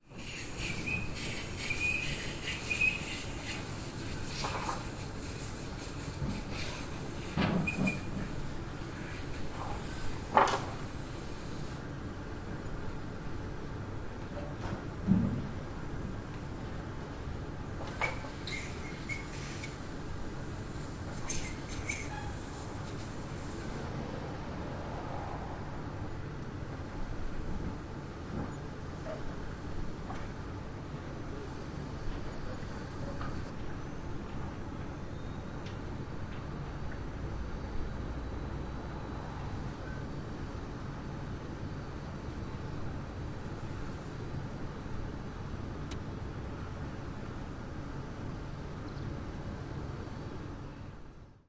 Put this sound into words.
110818-swish in spjald
18.08.2011: nineteenth day of ethnographic research about truck drivers culture.Spjald in Denmark. the yard of company producing some steel element. Waiting for unload during stoppage. Swish of some machine.
field-recording, machine, squeal, swish, swoosh, yard